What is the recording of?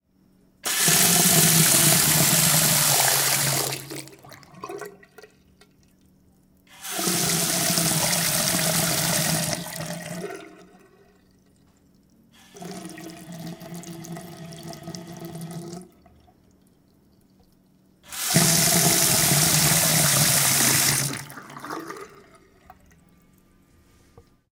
Me running my sink faucet in my bathroom.
faucet, running, sink, drain, bathroom, drip, water